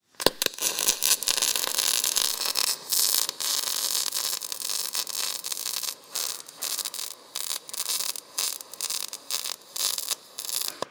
The sounds of welding